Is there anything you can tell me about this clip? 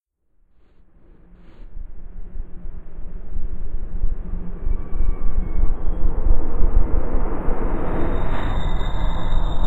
Growling Build up, Key tone end
A gradual growling bea with a deep tone to a scattered high note ending.